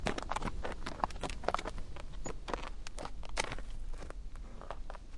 Horse Footsteps On Gravel 02
This is a snippit of a horse moving it's feet around on gravel/dirt.
Gravel, Hoof